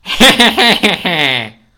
Hehehe - Laughter
A not-so-genuine laughter.
laughter, mad, happy